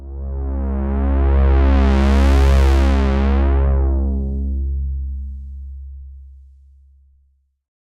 SynthesizerPulse made with Roland Juno-60